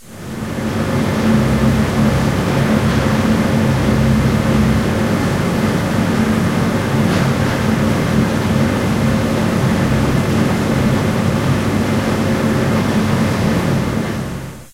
Background noise I
Background noise at Tallers building, vending machine area.